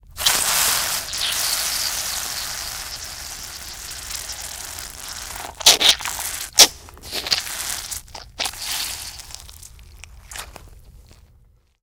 Blood Gush / Spray
Blood quickly escaping an open artery. (Actually just me squeezing the hell out of a grapefruit). Begins with a steady spray, then ends in several loud spurts. More Blood/Gore FX coming soon-ish.
Recorded in a studio with a Sennheiser 416, very close proximity. < 1' away. Through an mbox directly into ProTools, edited to remove head/tail ambience. You might hear me breathing in there, very low.
Horror, Blood, Squirt, Spray, Foley, Spurt, Sound-Design, Gush, Gore